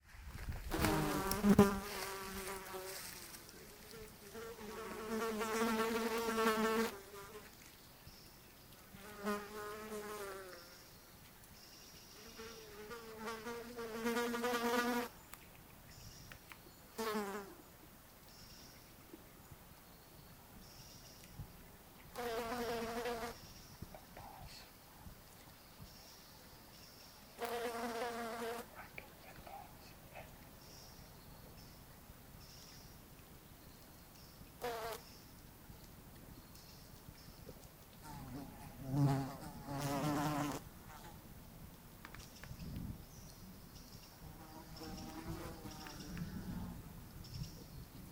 160712 FX Flies buzzing on Weird Mushroom M-RX
Recorded in Bielowieza Forest (Poland) with MKH50
Flies, Buzzing, Mushroom, Day, Forest